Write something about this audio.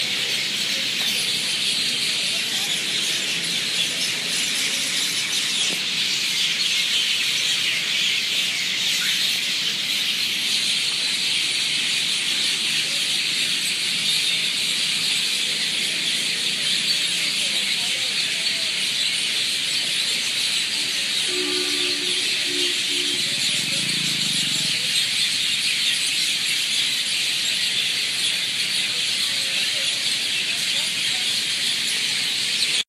Million Birds making noise
Birds chirping recorded in the evening. Some city sounds like horns and bike are also in the background.
ambience, bird, birds, chirping, city, evening, field-recording, nature, noise